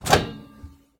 Appliance-Microwave-Door-Open-03
This is the sound of a microwave's door being opened.
Appliance, Door, Microwave, Open, Plastic